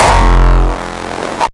Hardstyle Kick 6
A new layered Hardstyle Kick.
Bass, Distortion, Drum, Hard, Hardcore, Hardstyle, Kick, Raw, Rawstyle